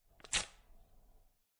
Fast ripping sound of some paper.